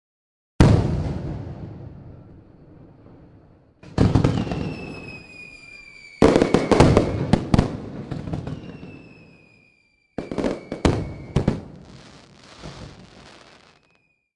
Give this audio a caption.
Fireworks recorded on a local holiday, very near to the source. Rode NTG-2 into Sony PCM-M10 recorder
ambience, field-recording, fireworks